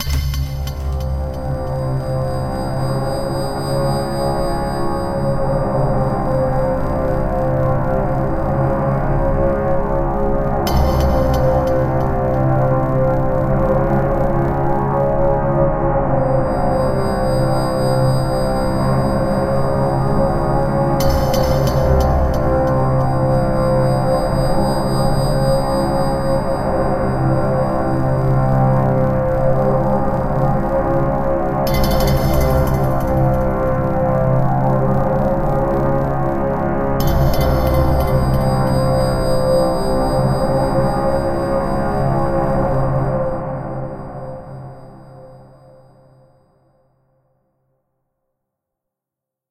Horror ambiance created using Kontakt Player
Horror, Ambient, Drone, Synth, Atmosphere, Ambiance